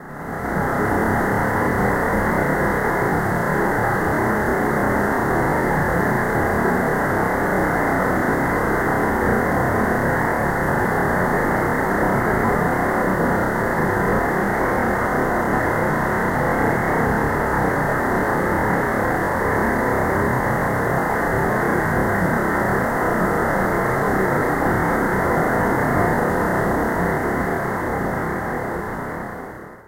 Composite noise pattern including pdf raw data.